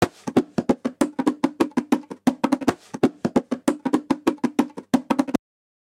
JV bongo loops for ya 3!
Closed micking, small condenser mics and transient modulator (a simple optical compressor he made) to obtain a 'congatronic' flair. Bongotronic for ya!
bongo, congatronics, loops, samples, tribal, Unorthodox